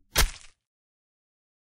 Knife Stab
This is just a random sound I made by stabbing some cabagge...
action, knife, stab